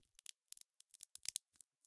Breaking open a pecan using a metal nutcracker.
pecan, nut, crack, shell